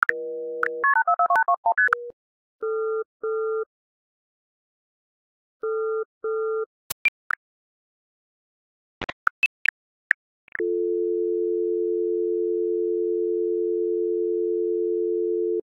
I had another listen to the outpulse sequence at the end of Pink Floyd's "YOUNG LUST" So, using the phone tones I created previously - plus a few more - I recreated most of the last sound effect sequence heard at the end of the song. All original effects created on SoundForge8 - arranged in a similar way as on the album."this is the United States calling... are we reaching?... He keeps hanging up"
tones, soundeffect, electronic